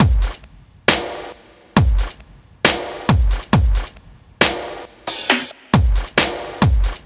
Cool drum loop
8-bit, awesome, chords, digital, drum, drums, game, hit, loop, loops, melody, music, sample, samples, sounds, synth, synthesizer, video